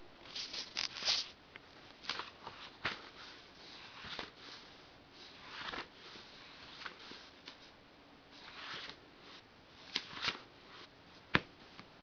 Book Pages Turning
Turning book pages
book, Dare-12, pages, Turning